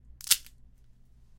Broken Branches
little borken branches
Branches, Broke, litte